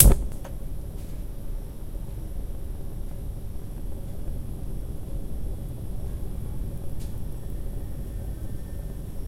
Owen on
burning, flame, oven